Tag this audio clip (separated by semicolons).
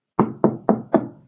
door; recording